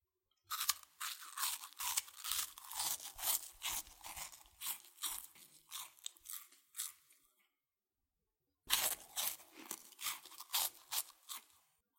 Eating Chips
Eating crunchy chips.